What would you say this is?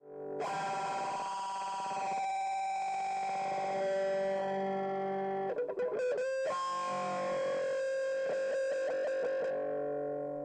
high pitch distortion from an electric guitar connected to an amp